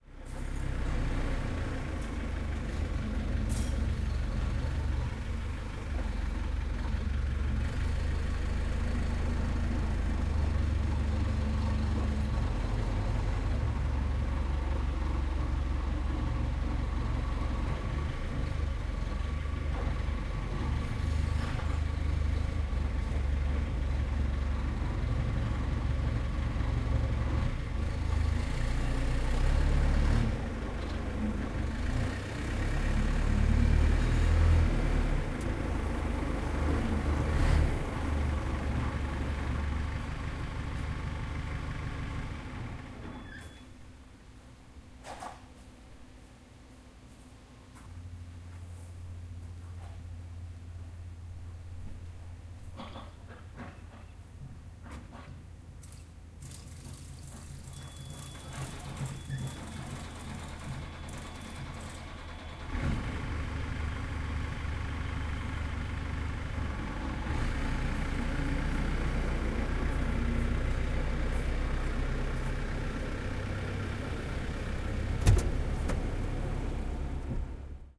110803-load in hadsund
03.08.2011: fourth day of the research project about truck drivers culture. Hadsund in Denmark. A farm courtyard. Sound of loading truck with blackcurrant.
denmark, field, field-recording, forklift, hadsund, load, loading, noise, tractor, truck